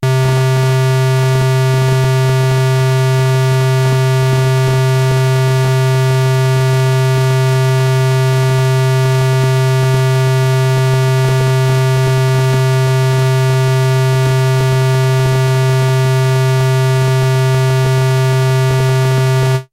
Mopho Dave Smith Instruments Basic Wave Sample - SQUARE C2
basic, dave, instruments, mopho, sample, smith, wave